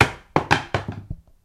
Heavy object falling on concrete
clatter, crash, objects